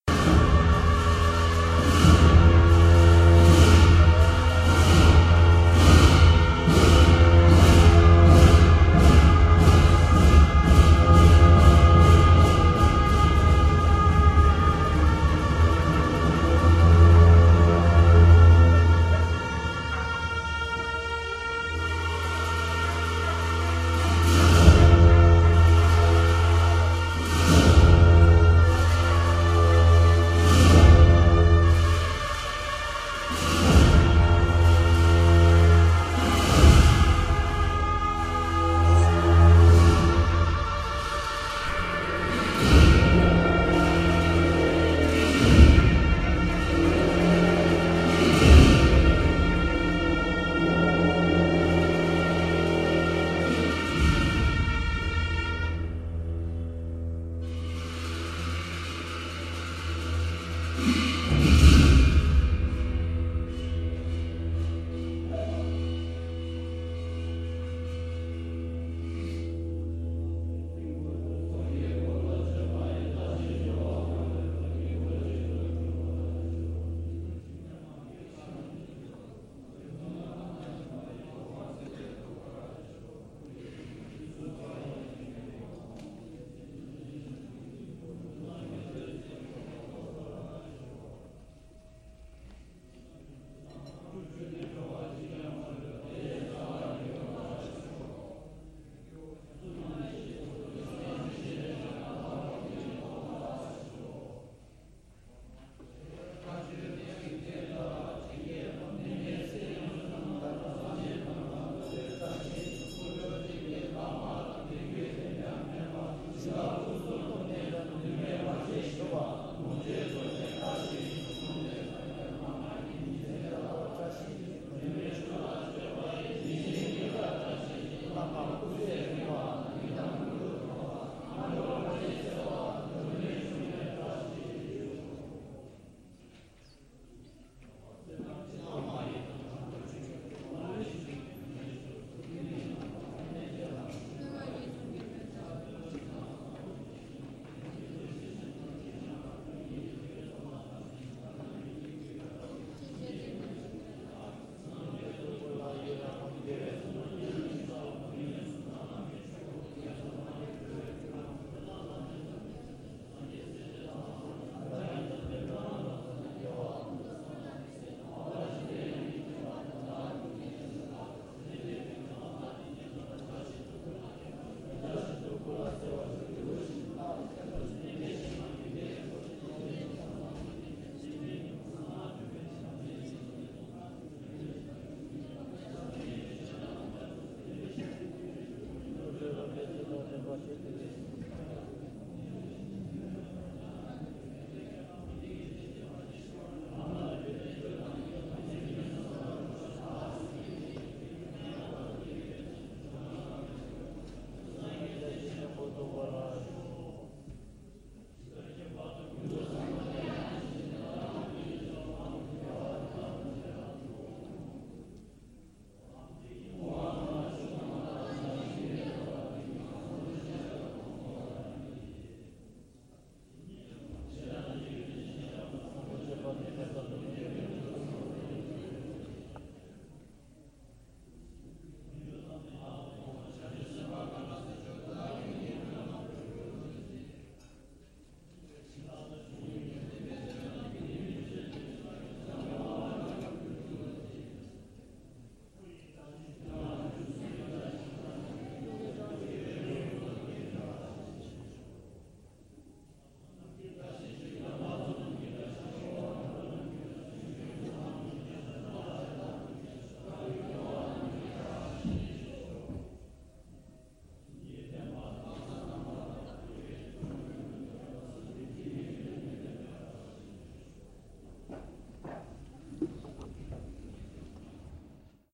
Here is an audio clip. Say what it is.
BR 075v2 Himalaya BuddhistMonks
In this file, you’re located in a Himalayan monastery, and you can hear Buddhist monks praying, chanting, playing drums, horns and bells.
Recorded in September 2007, with a Boss Micro BR.
ambience, atmosphere, bells, buddhism, Buddhist, chanting, drums, Field-recording, Himalaya, horns, India, mantra, monastery, monks, pagoda, prayer, soundscape, temple, Tibet, Tibetan, voices